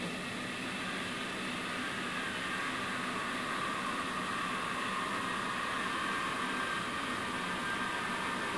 Second fragment. An eerie wail generated by the quiet parts of a washing machine cycle. Edited a bit.